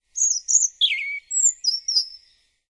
Bird Whistling, Robin, Single, 13
A single chirping call of an English robin bird. Approximately 2 meters from the recorder.
An example of how you might credit is by putting this in the description/credits:
The sound was recorded using a "Zoom H6 (XY) recorder" on 9th May 2018.
tweeting, bird, call, chirping, robin, birdsong, tweet, calling, chirp, song